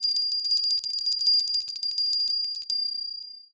Shaking a small bronze bell.
bell, small